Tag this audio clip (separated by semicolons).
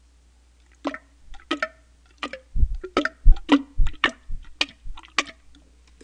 bathroom; plunger; toilet